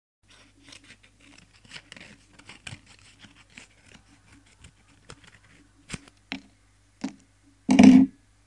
Cutting paper
cutting, paper, scissors